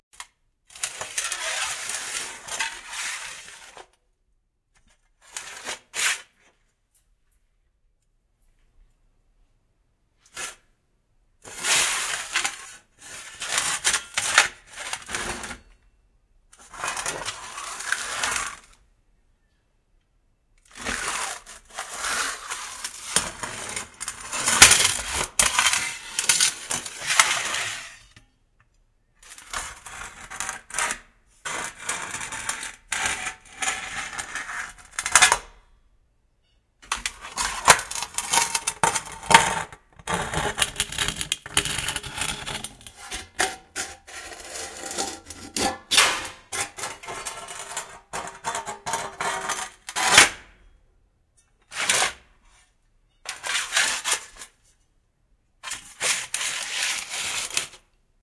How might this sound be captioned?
sheet metal pieces drag on workshop floor various1
drag, floor, metal, pieces, sheet, various, workshop